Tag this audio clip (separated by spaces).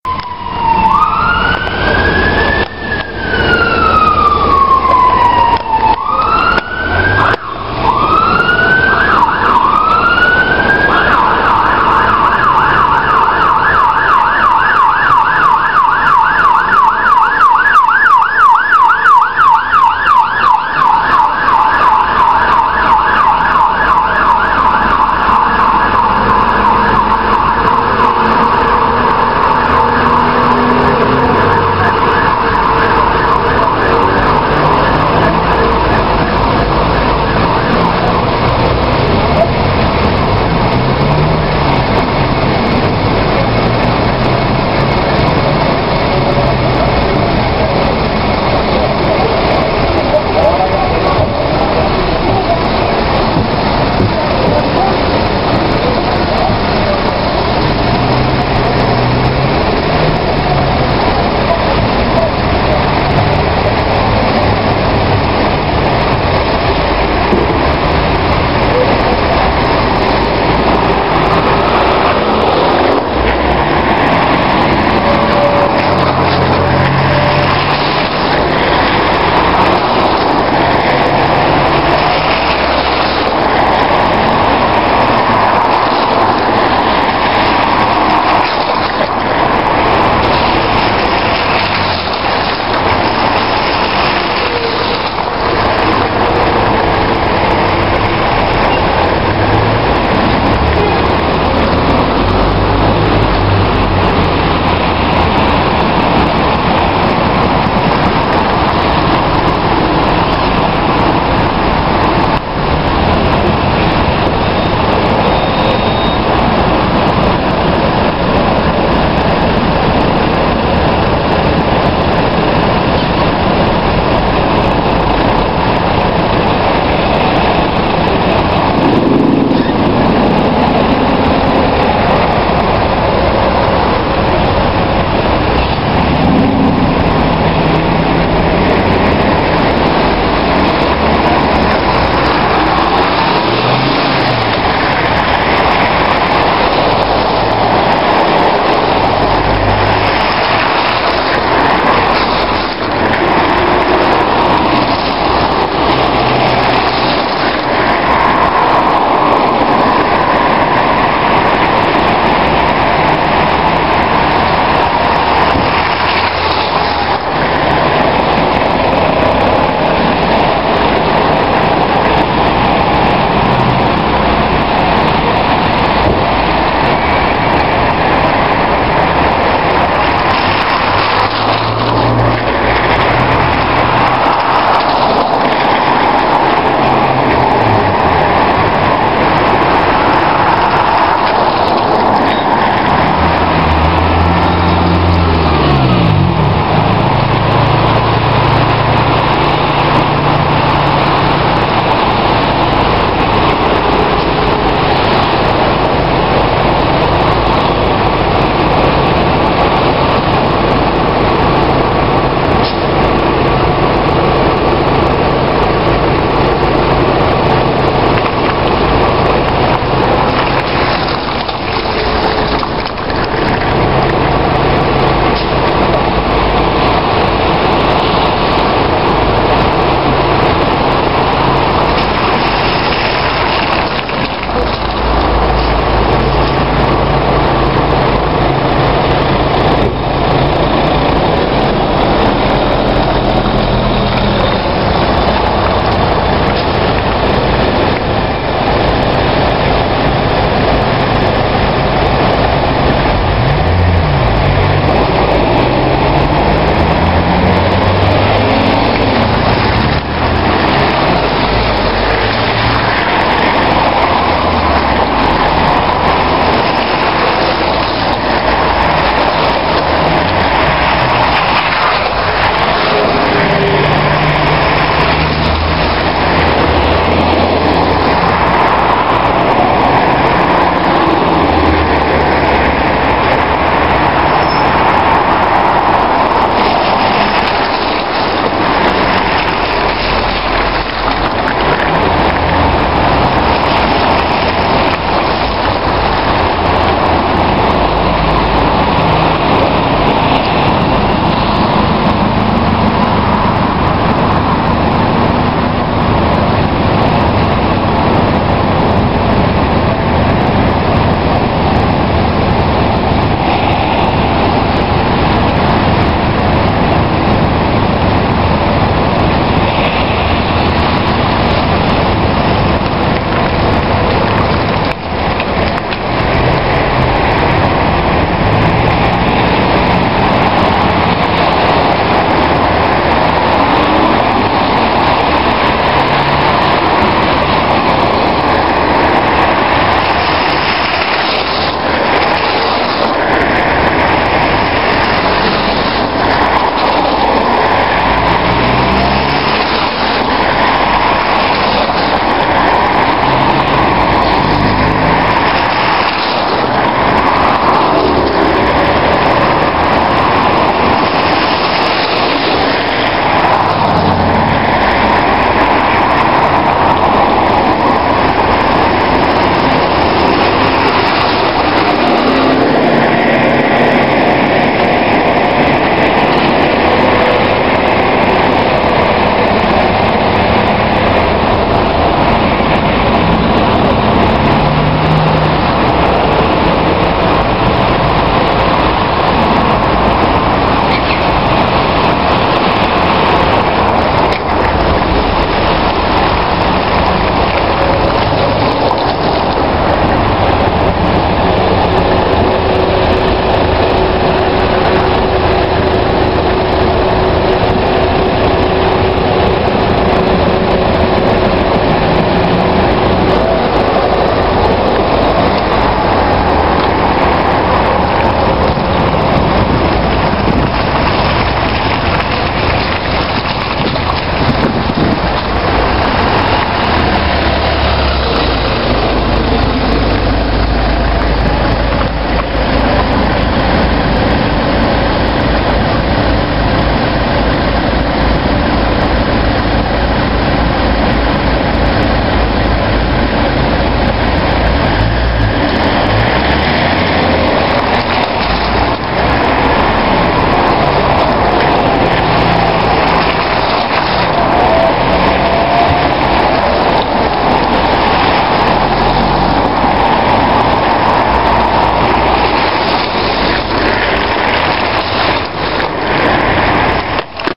Traffic Cars Transport Automobiles Wet Lorries Rainy Town